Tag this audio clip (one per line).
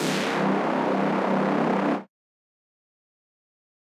bass
gritty
serum